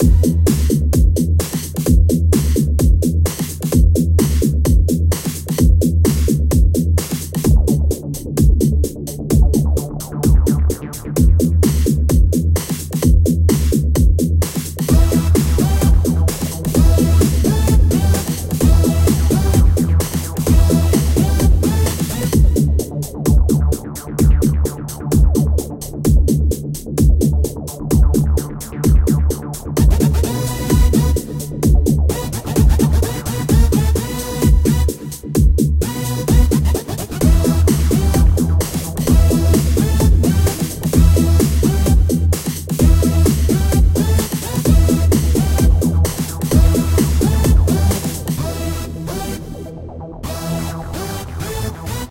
Hope you enjoy this.
Cheers!